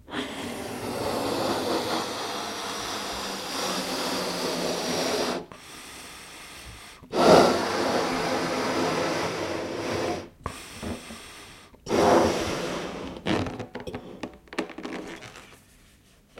gonflage ballon1
various noises taken while having fun with balloons.
recorded with a sony MD, then re-recorded on my comp using ableton live and a m-audio usb quattro soundcard. then sliced in audacity.
air, balloon, field-recording, fun, indoor, inflate, noise